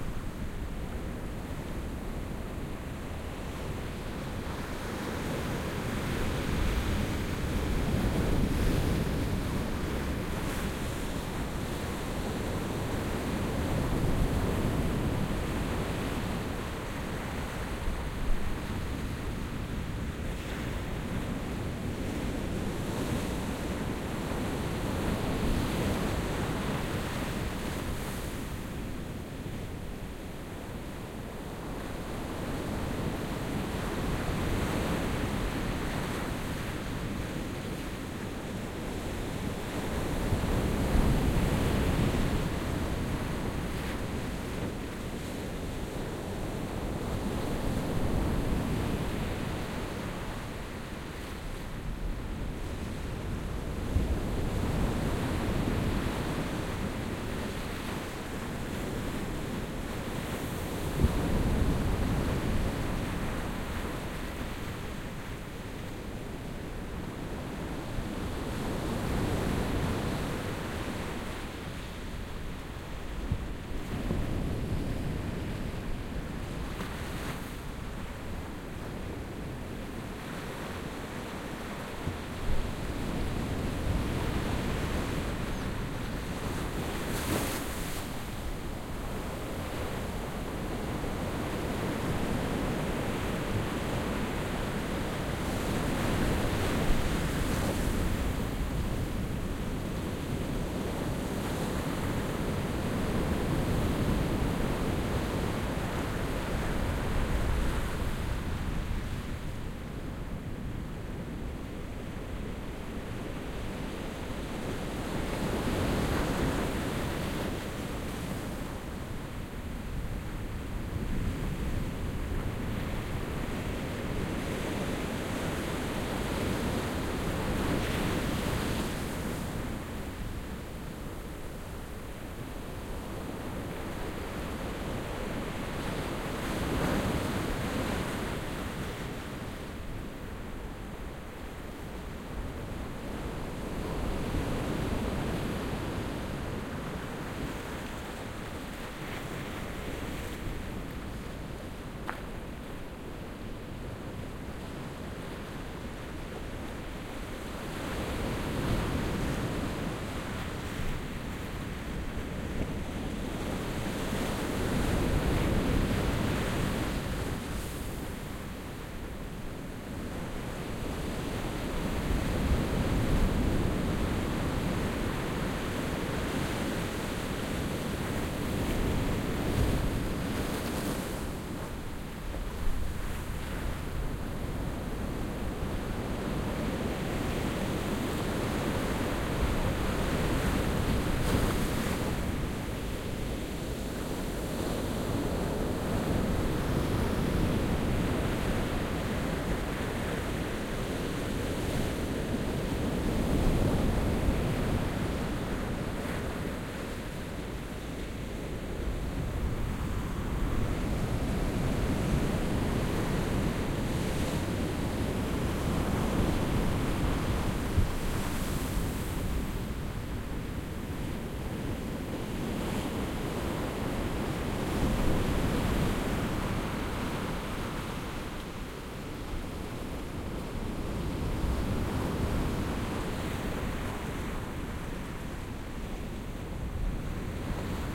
porto 19-05-14 quiet to moderate waves on sand and rock beach close recording
Quiet day, close recording of the breaking waves.